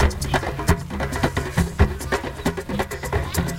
04 Hippie Hill Drumming 2 (edit)
Recorded mr. "SFindigo".
I'm just a little bit, remove distortion & EQ.
park, outdoor, drumming, drum